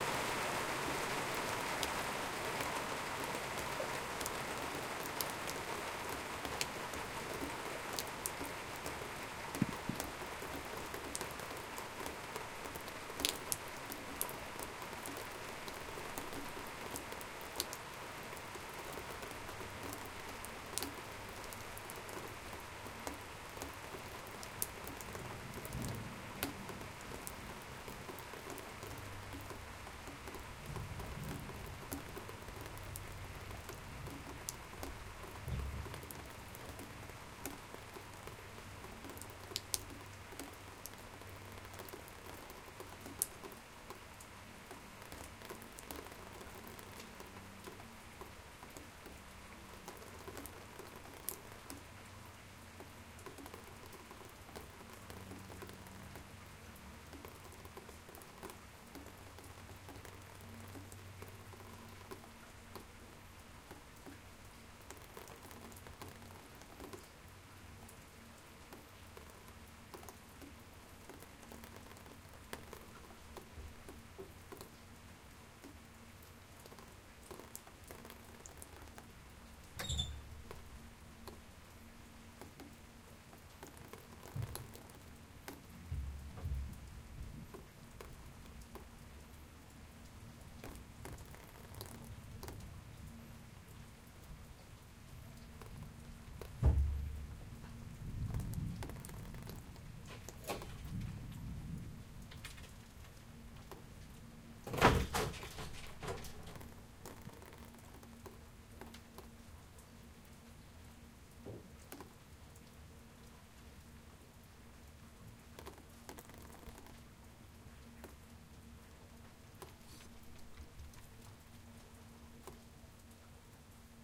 Heavy Rain Stopping 05
Rain from a thunderstorm slowly coming to a stop. Recorded outside but from under a roof.
atmos, atmosphere, drip, dripping, field-recording, flood, hail, heavy, lightning, rain, raining, splash, splashing, thunder, thunderstorm, water